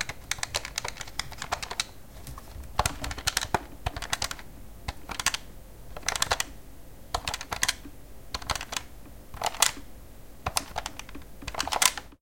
Sounds of pressing buttons on a plastic stationary telephone. Zoom H2 recording, close distance, windshield, low gain.